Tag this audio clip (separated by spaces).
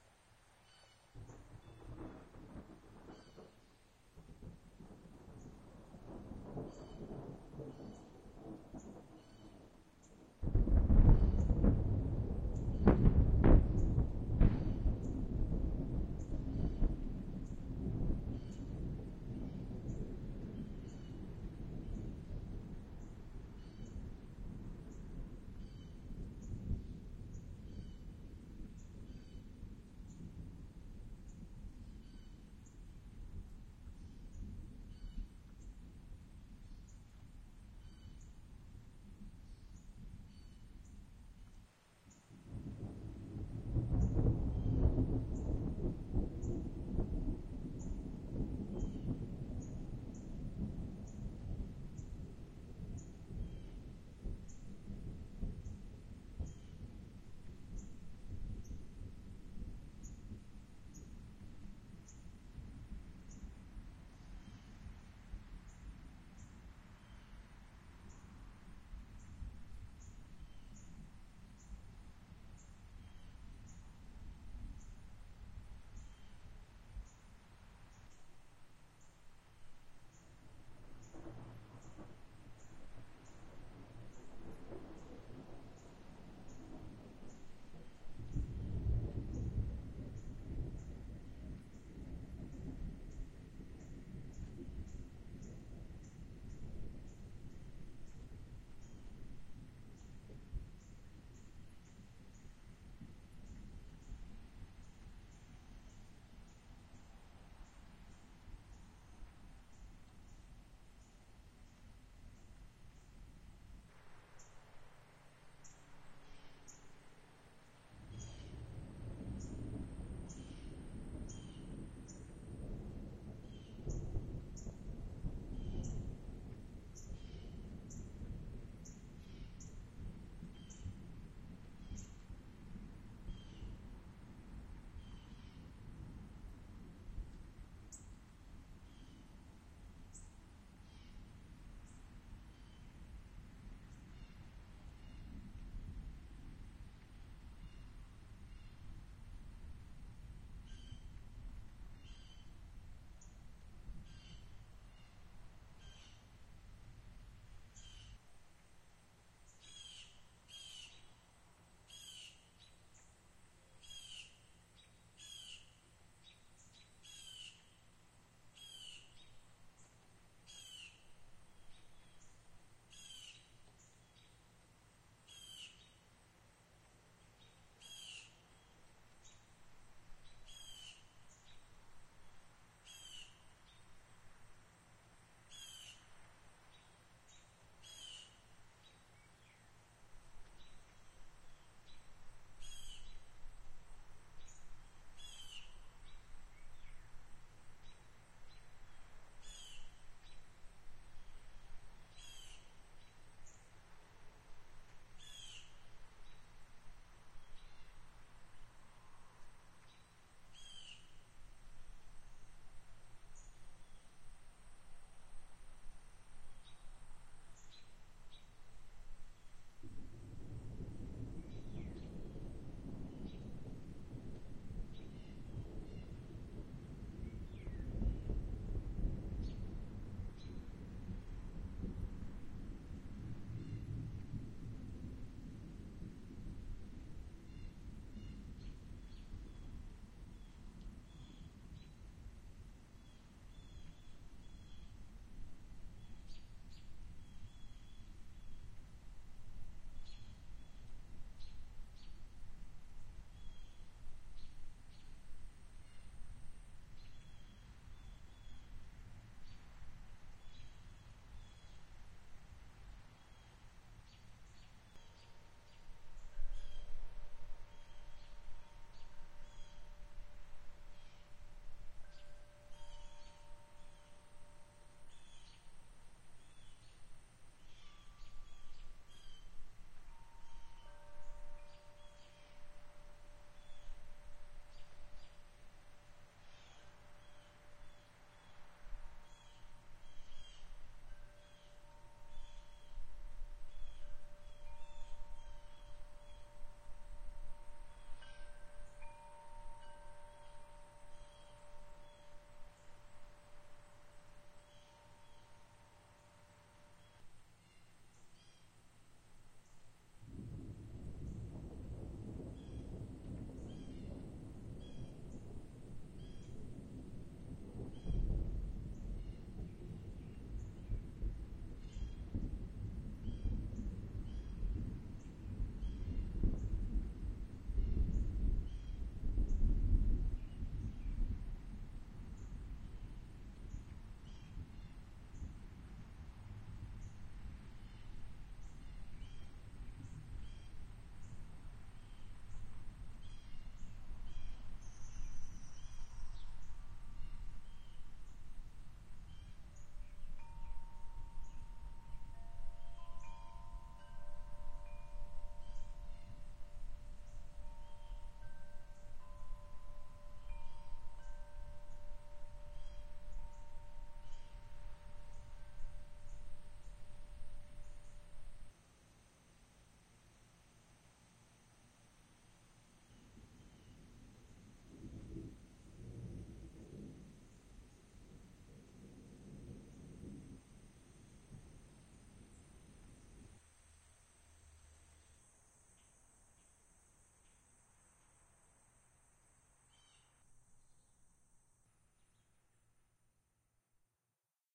birds field thunder